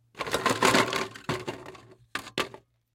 Scoop in Ice Bucket FF288
Scooping ice out of container full of ice, ice hitting metal
container, Scooping, ice